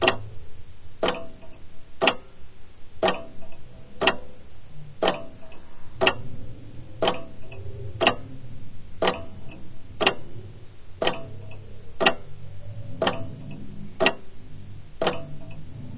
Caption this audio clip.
Tabletop clock ticking, 1/4 speed
Prim clock, made in Czechoslovakia in the '70s or '80s maybe.
clock, clockwork, slow, slowed, tick, ticking, tick-tock